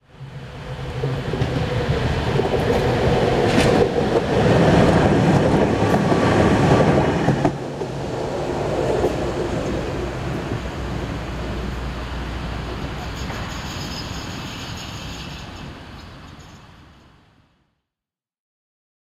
A passing tram